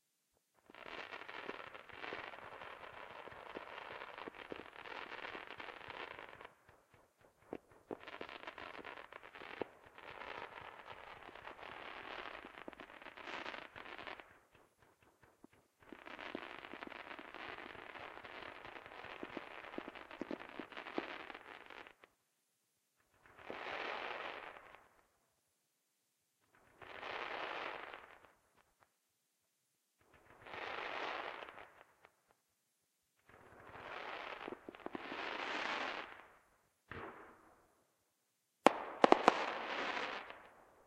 Fire Cracker Show part4 - finale
Part of a 4th of July fire cracker show.
july, boom, cracker, independance, explosion, show, rocket, day, pop, fire, 4, bang